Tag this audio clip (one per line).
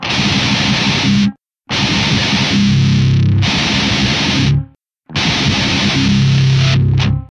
break-down
breakdown
death-metal
deathmetal
death-metal-riff
guitar
guitar-riff
metal
metal-riff
riff